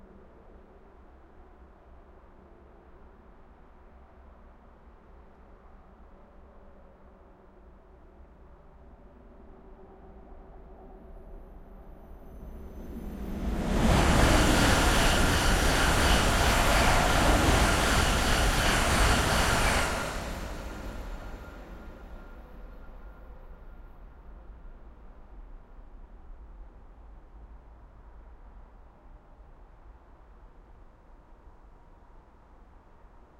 highspeed train passing
A track for all you trainspotters! An ICE fasttrain passing Montabaur / Germany station with quiete a speed.
Makes a pretty spooky sound, as the train seems to come out of nowhere, especially as the tunnel exit wasn´t far from the place, where the recording was done.
Sony PCM-M10 recorder.